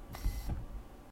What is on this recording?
I spray air freshener in the room. Edited to sound mechanical. (Game Development)